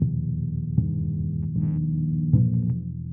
recording by me for sound example to my student.
certainly not the best sample, but for training, it is quiet good. If this one is not exactly what you want listen an other.
bass, electric